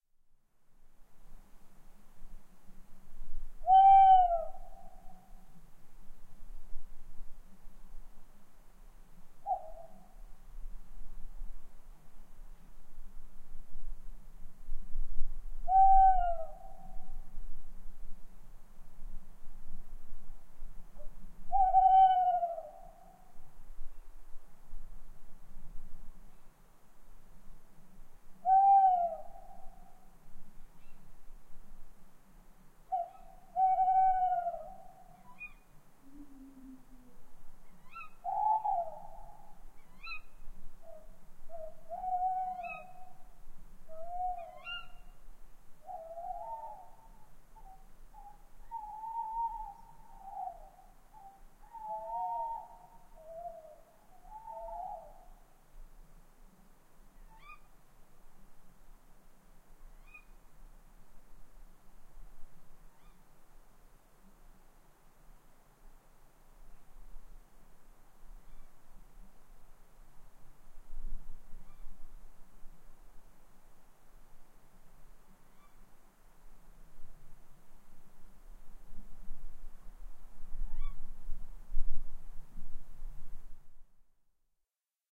A stereo field-recording of three (0r possibly four?) Tawny owls (Strix aluco) hooting and screeching.The males hoot and the females screech.There is some wind noise in the trees. Rode NT4+Dead Kitten > FEL battery pre-amp > Zoom H2 line in
bird, birds, field-recording, hoot, hoots, owl, owls, screech, stereo, strix-aluco, tawny-owl, xy